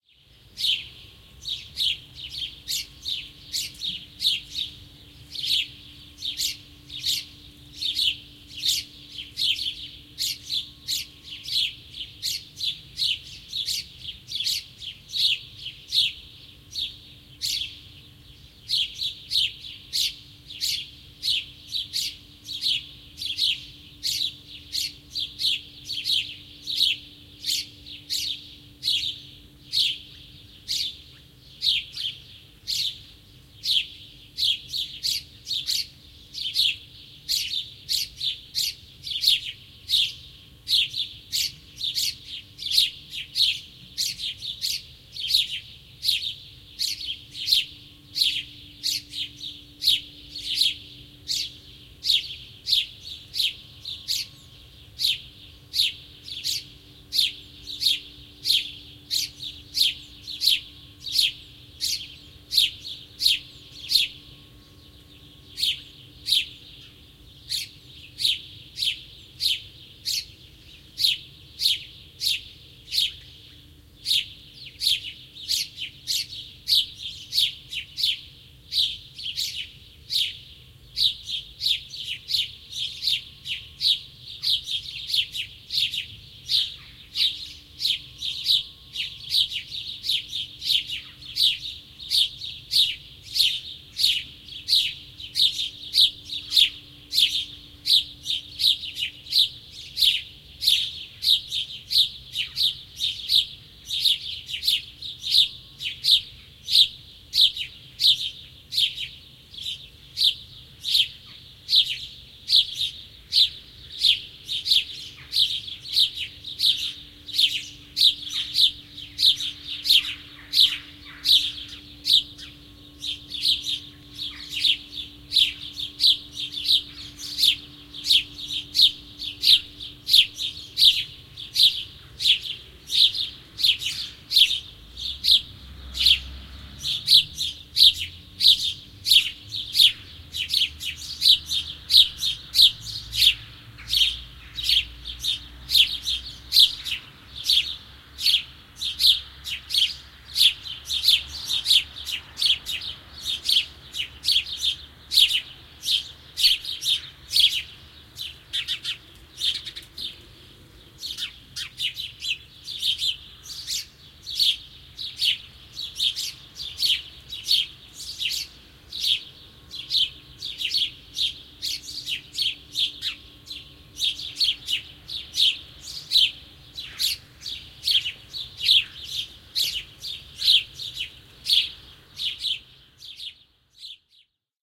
Varpusparvi visertää pensaassa.
Paikka/Place: Suomi / Finland / Nummela
Aika/Date: 07.05.1986